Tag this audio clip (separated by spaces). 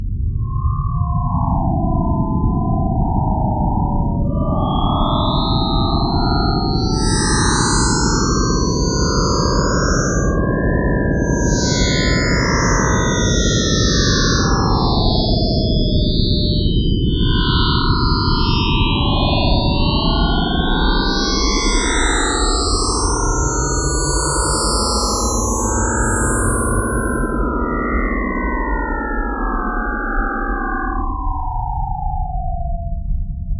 image-to-sound matter-transfer-beam scifi transporter ufo